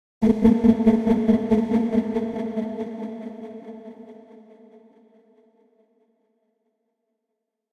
ae ominousPulse 140bpm
echo, freed, portraits-of-a-woman, pulse, stab